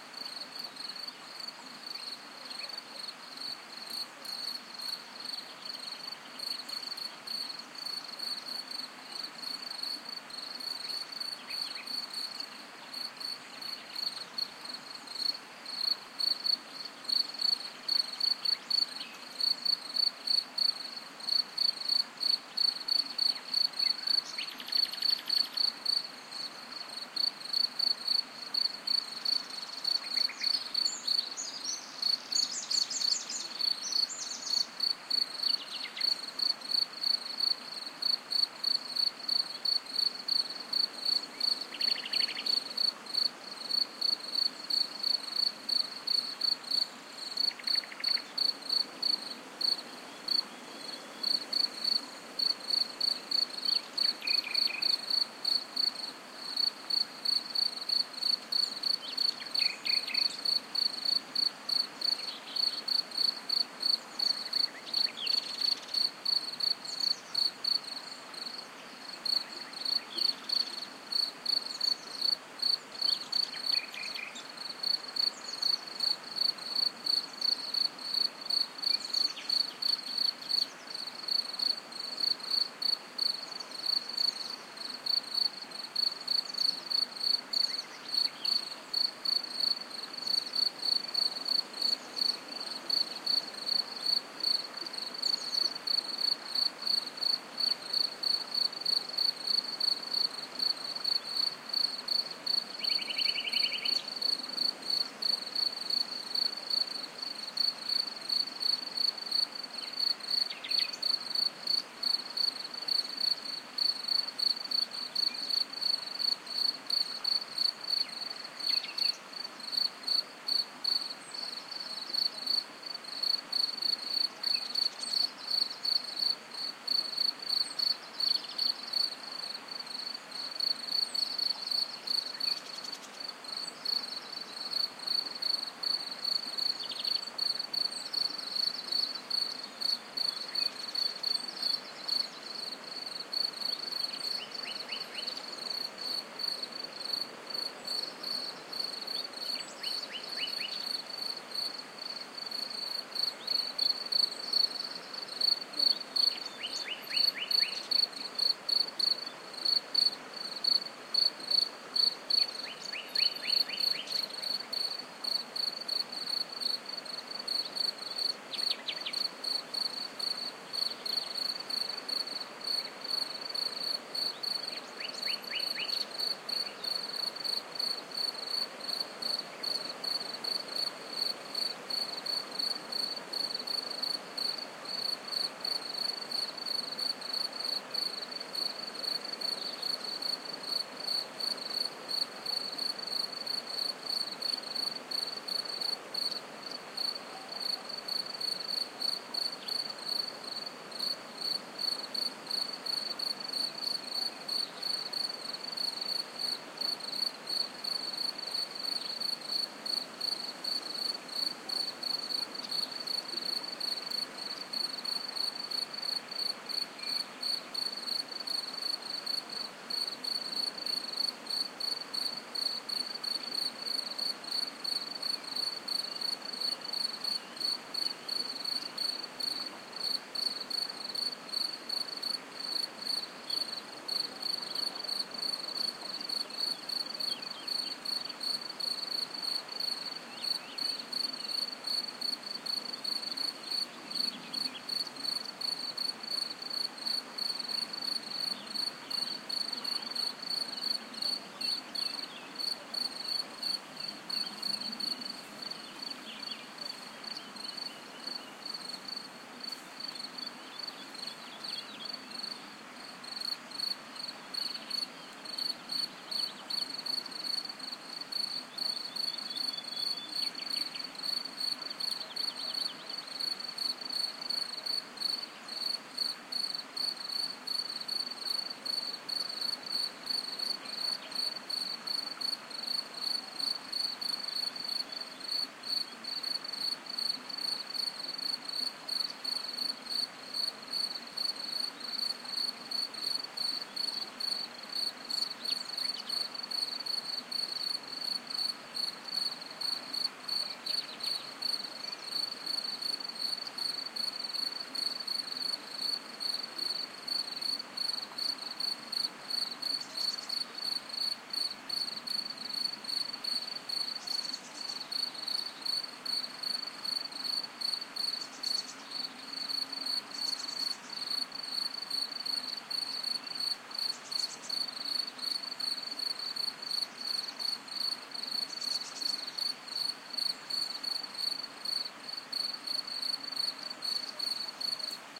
20160414 dusk.calm.26
Crickets, Nightingale, and noise of a stream in background. It's not dark yet, but it's getting there. Audiotechnica BP4025 inside blimp, Shure FP24 preamp, PCM-M10 recorder. Recorded near La Macera (Valencia de Alcantara, Caceres, Spain)Audiotechnica BP4025 inside blimp, Shure FP24 preamp, PCM-M10 recorder. Recorded near La Macera (Valencia de Alcantara, Caceres, Spain)